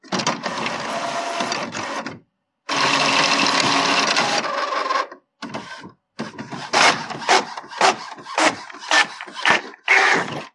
Recording of my inkjet office printer printing a document paper at normal speed. Parts of the audio can be edited out to layer machinery functioning or robots.
machine, photocopier, print, printer